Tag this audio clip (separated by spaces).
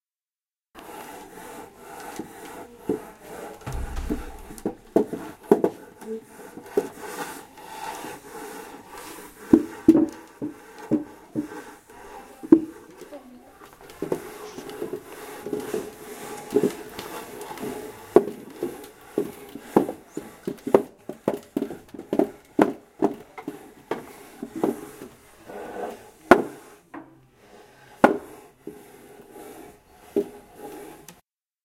Piramide-Ghent Sonic-Snap Zakaria